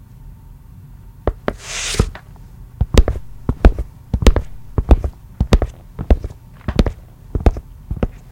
A man walking on tile floor in tennis shoes. Made with my hands inside shoes in my basement.